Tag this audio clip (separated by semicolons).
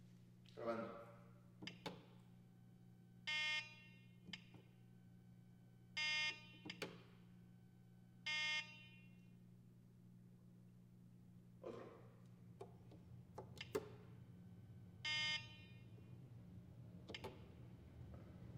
elevador; room